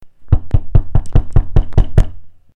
door knocking pounding
variation of knocking on a heavy wooden door in my 100 year old home. Heavier pounding, some echoing
door, knock, knocking, knuckle-rap, pounding